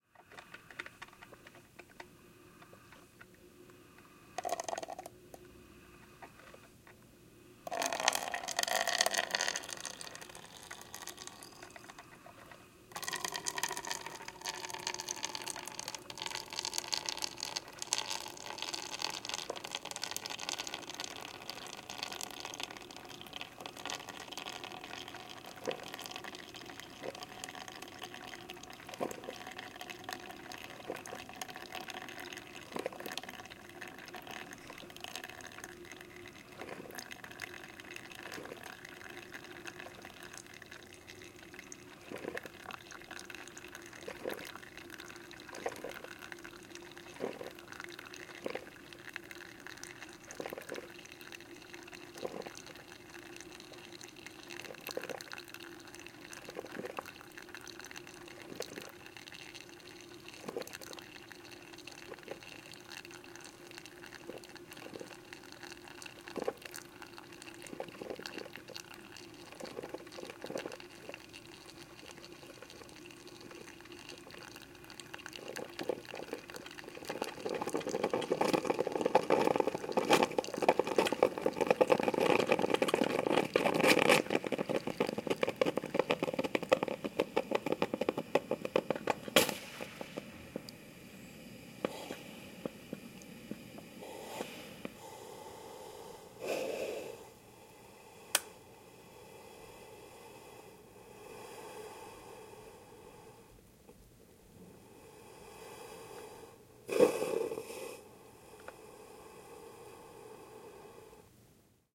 Hotel Coffeemaker 0322
Single-cup hotel room coffeemaker with drips pouring into plastic cup, pulses of steam and steam spurt at end.
liquid, steam